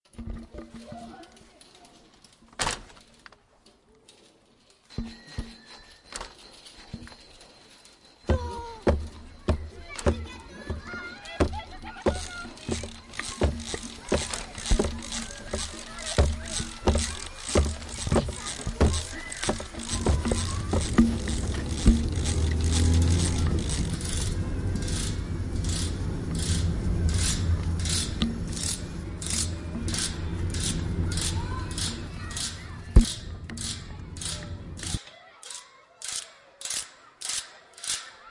TCR-sonicpostcard-marie,nora
France, Pac, Sonicpostcards